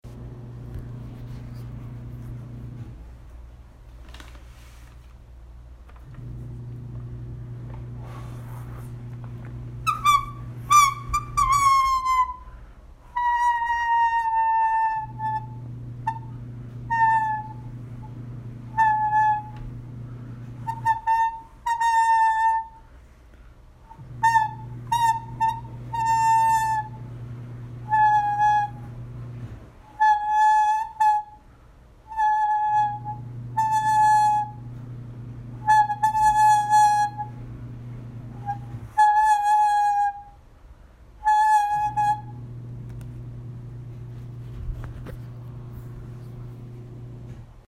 Homemade paper whistle
I literally blew on a regular sheet of paper to produce a whistle sound
home-made, homemade, instrument, whistle